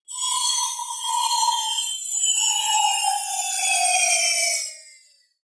Tweaked percussion and cymbal sounds combined with synths and effects.